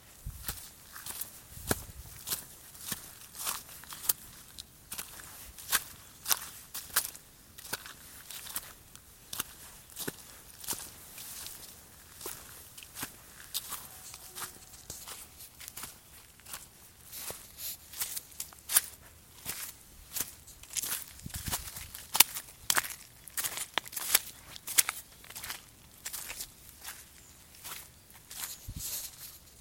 Footsteps on a muddy path
Walking through mud in a forest.
floor, mud, walking